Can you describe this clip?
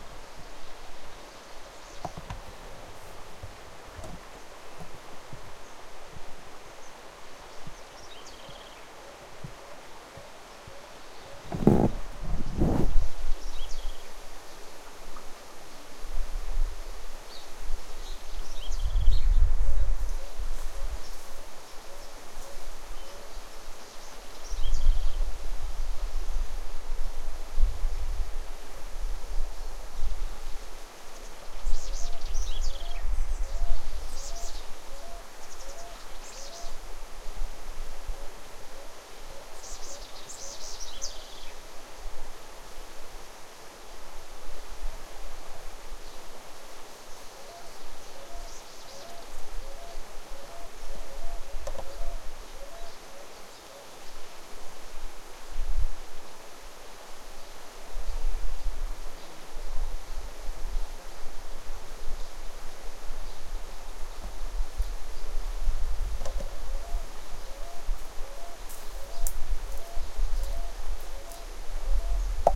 Campo Rio sur de Chile
recorded in the south of chile. River, water, birds, leaves and wind are heard.
river, leaves, American, Chile, wind, South, water